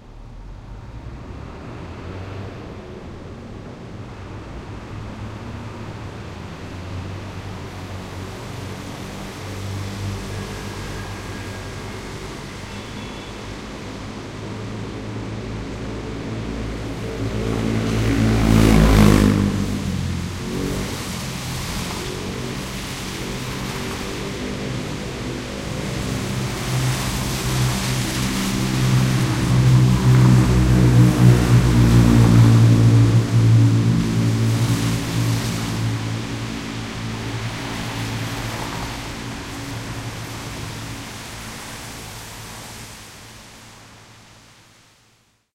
The sizzle of tires on wet pavement. Panning & The Doppler Shift. Raw.
panning, taiwan, wet-pavement, traffic, car, city, taipei, 2009, doppler-shift, h2, field-recording, scooter